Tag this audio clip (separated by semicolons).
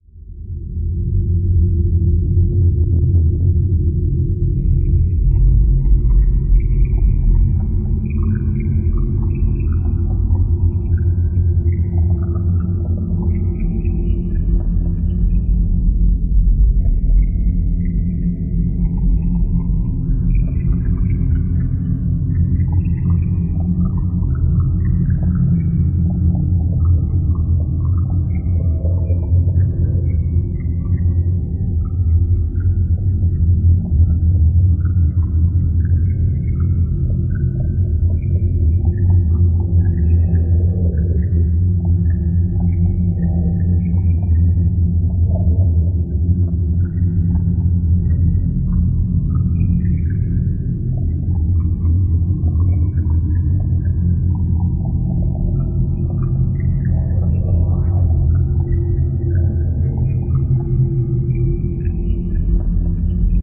fx; scape; sci-fi; badluckbriansound; drone; ambient; soundeffect; sfx; movie; sound-design; freaky; sick; film; wow; sample; theather; sound; effect; sounddesign; future; horror; bestsamples; space